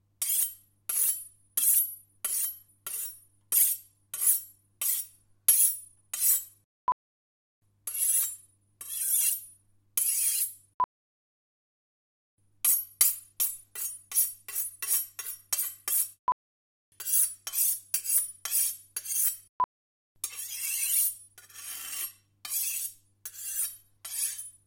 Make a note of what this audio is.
Sharpen Knives
small, ambience, cafre, stereo, cafe, catering, busy, field-recording, less, pack